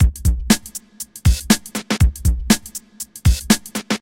duppyHipFunk04 120bpm
Hip-hop funk style beat with reverberating snare
120bpm, beat, break, breakbeat, drum, funk, hip, hop, loop